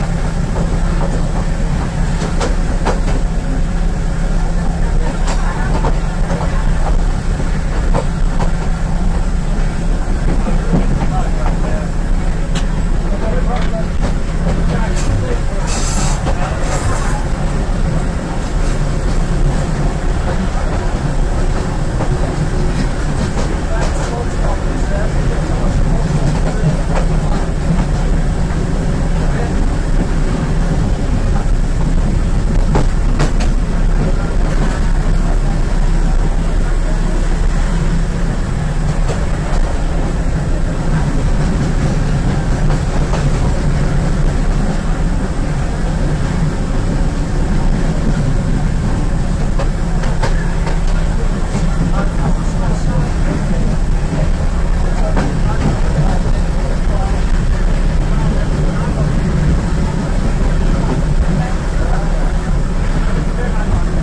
On a train. recorded between 2 wagons. Java, Indonesia.
- Recorded with iPod with iTalk internal mic.
field-recording, indonesia, train